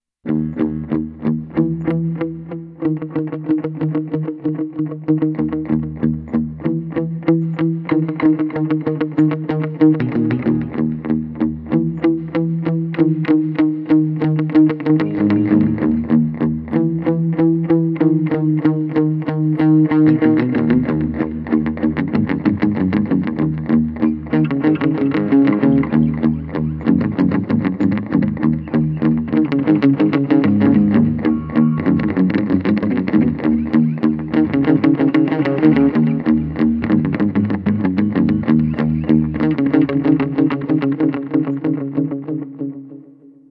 E flat echo delay mamma
sorta spooky and creepy, almost as if something is following you or hiding from you. Low gain but tones of echo and delay.
ambient, creepy, delay, echo, following, guitar, haunted, horror, mamma, mia, reverb, scary, spooky, terror